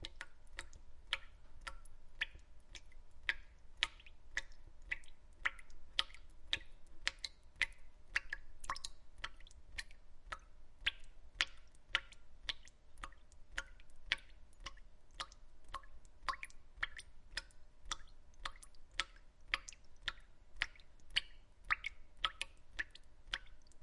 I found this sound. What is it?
Water drops in a bucket in my kitchen. ZOOM H1.